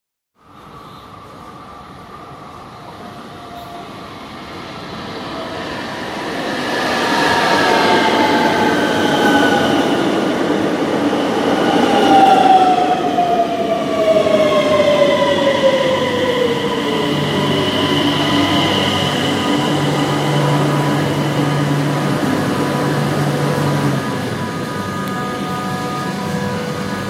A train arriving at its station.
Have a great day!